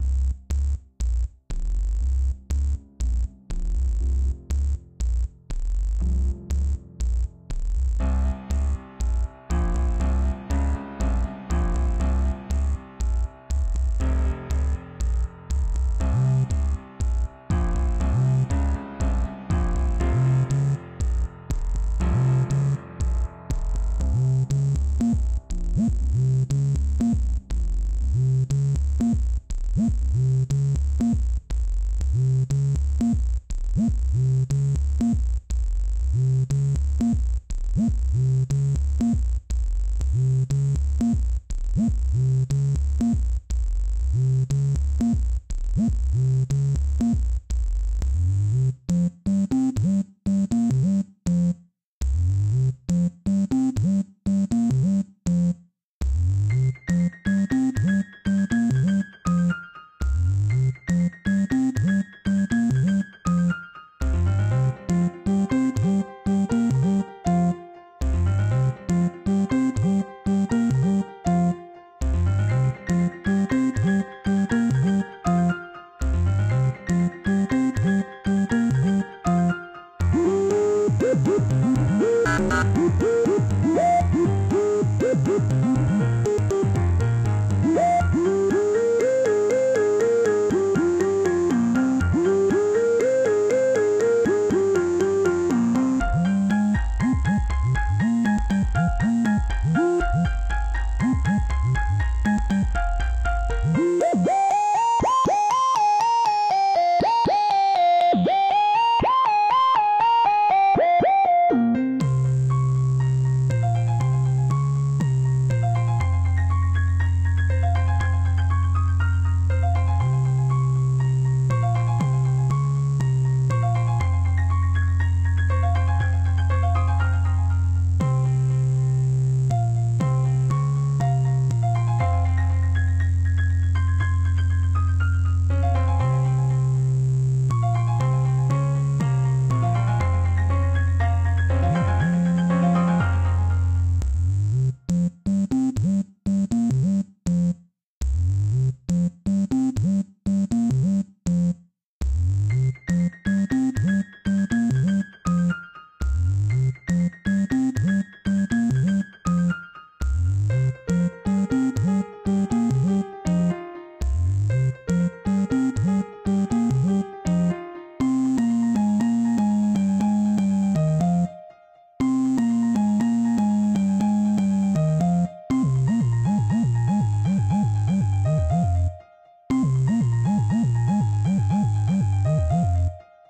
The Pact Full Version
dramatic and minimalist theme with psychadelic salsa piano and some kind of sub dub bass
You can use the full version, just a piece of it or mix it up with 8 bar loopable chunks.
video, bass, retro, salsa, sub, music, loop, pact, dub, game, piano, drama, synth, circus, electro, promise, danger, psychadelic, theme, phantom, loopable, dramatic